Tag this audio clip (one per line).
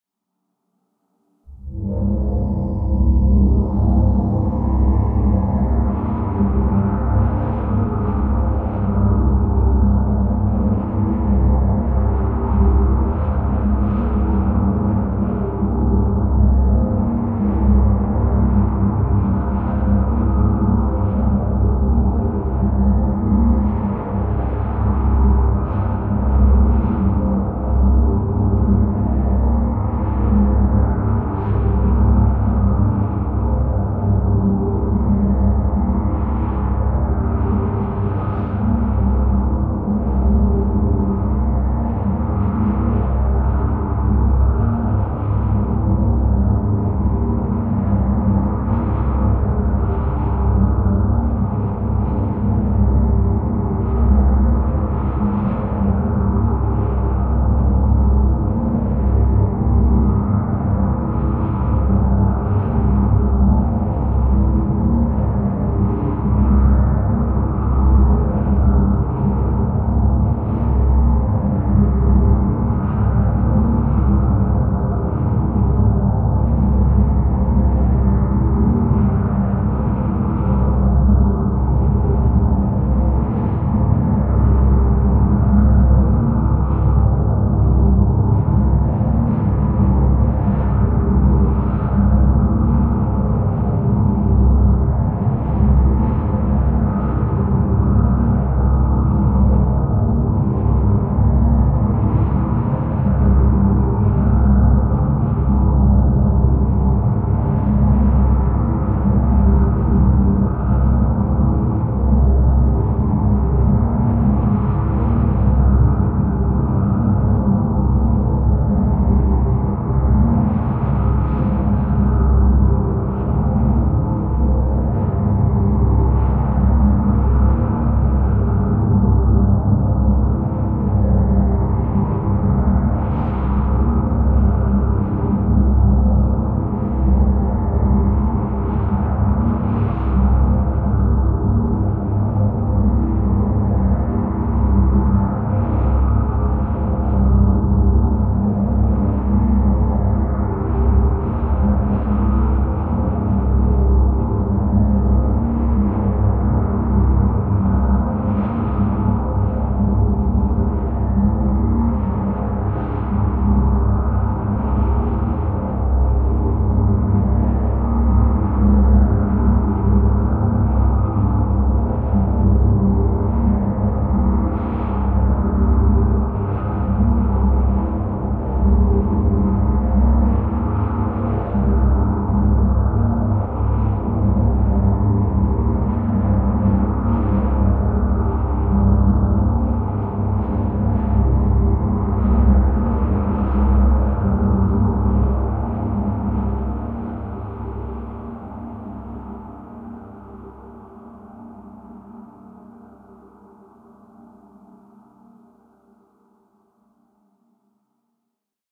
evolving
soundscape
drone
experimental
artificial
multisample